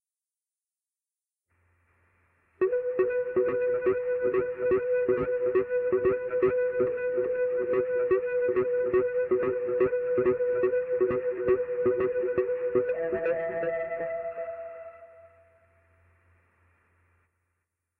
pitch shifted toy keyboard
A setting on my toy keyboard called "pearl drop" shifted two octaves down (shifting this far down made the sound just start to break up in a glitchy sounding way)
breaking-up, distorted, electronic, glitchy, keyboard, pitch-shift, repetitive, toy, toy-keyboard